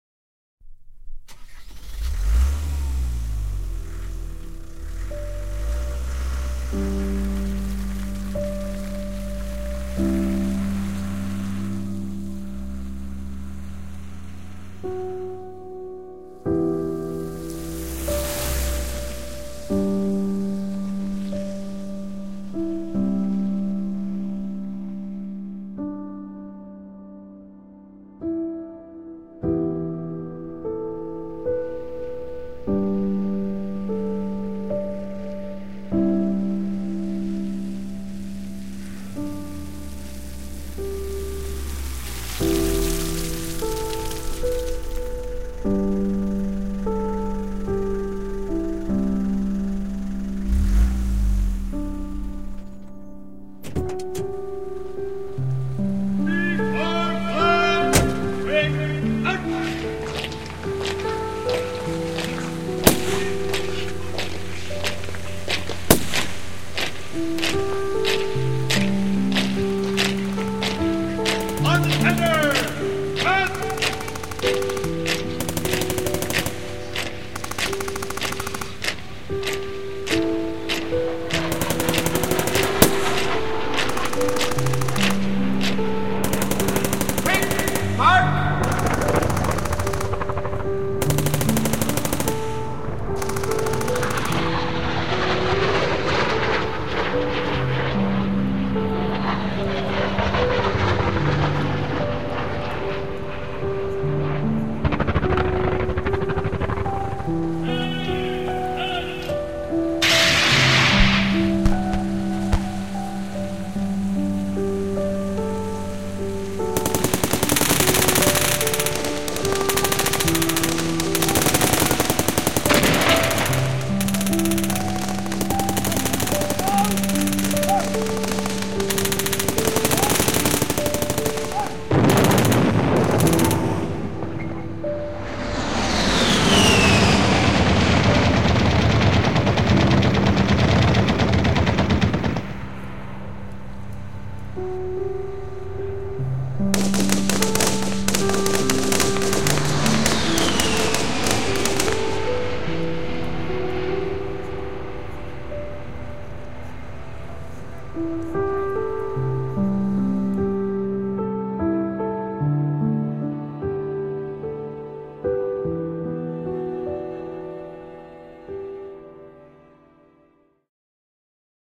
Soldiers Flashback
Solderers flashback is a short piece that was created for a trailer, best to do some voiceover.
combat, flashback, war